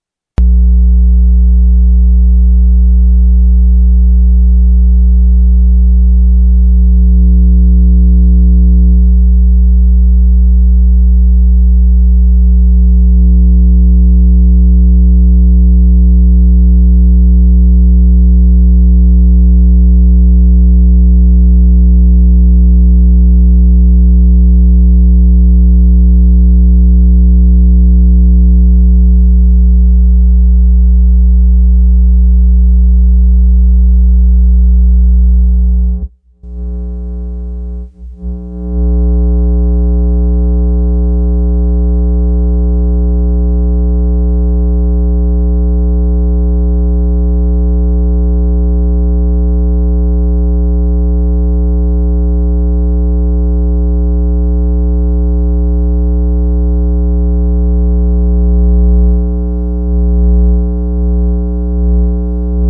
Phone transducer suction cup thing on various places on an electric trimmer.
buzz, electricity, electro, hum, magnetic, transducer